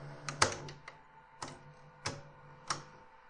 10 REVIEW STOP
Recording of a Panasonic NV-J30HQ VCR.
retro, cassette